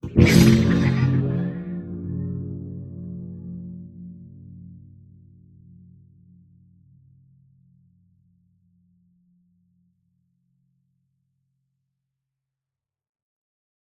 metallic effects using a bench vise fixed sawblade and some tools to hit, bend, manipulate.